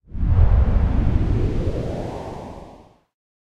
jobromedia-bullet-speedup-finnished
This is an effect when you go out of slow motion mode in games like Max Payne, and movies like the Matrix.
matrix, game, slow-motion, up